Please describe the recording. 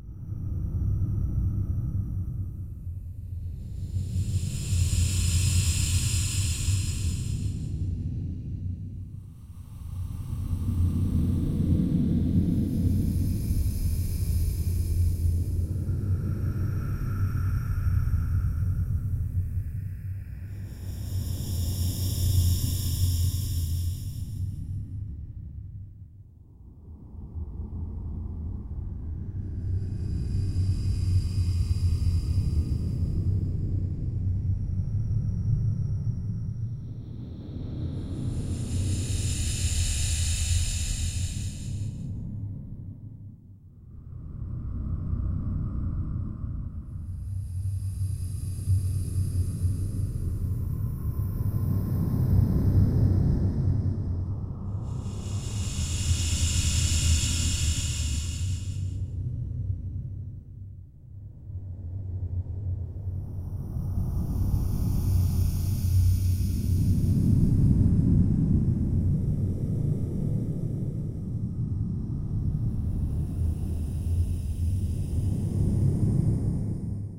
Creepy Background Noise 1 (Loopable)
This is an eerie sound made from recording my breathing with a whistle in my nose and then slowing it down and altering it quite a bit.
ambiance, ambience, ambient, atmos, atmosphere, atmospheric, background, background-sound, creepy, eerie, haunted, horror, loop, loopable, low-pitch, noise, ominous, scary, soundscape, spooky, white-noise